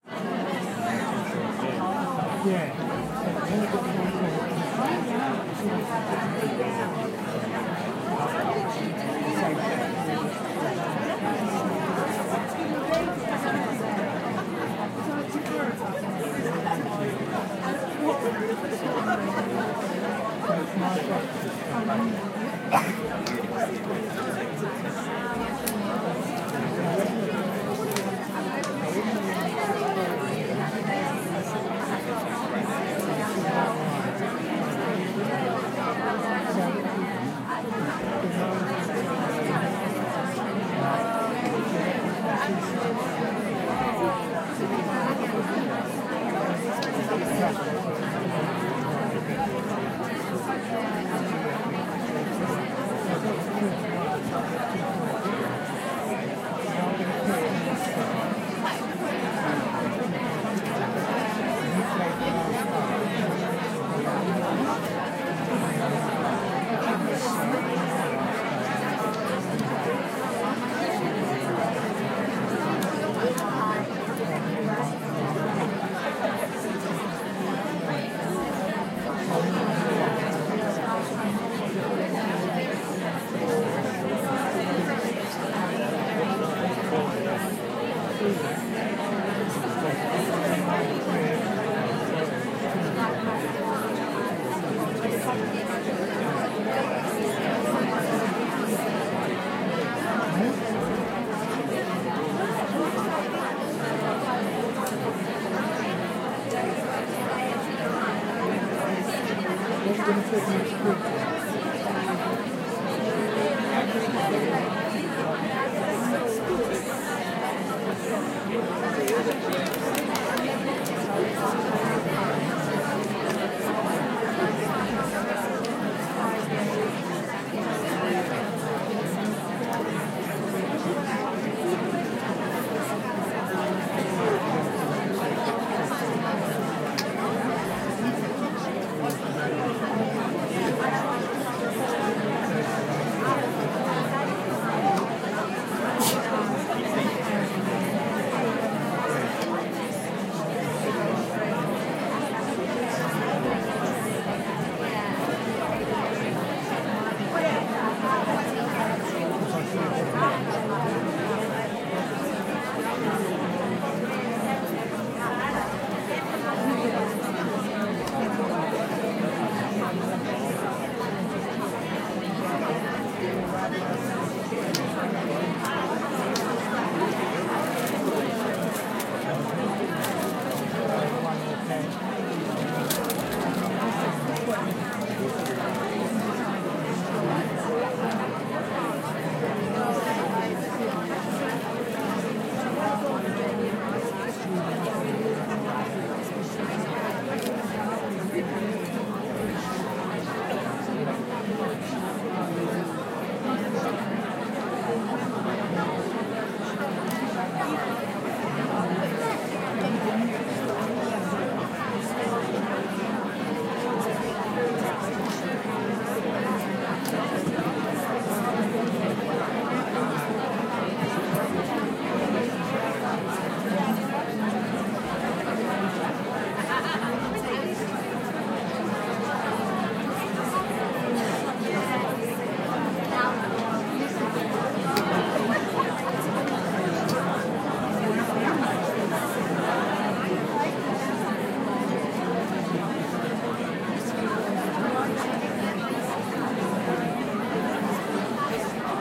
salle.spectacle.remplie
audience waiting to a London Musical
audience, crowd, theatre